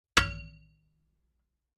Metallic clang. Created by banging a baking pan with a bass drum mallet of several different materials, then layered.
foley, metal, hit, clang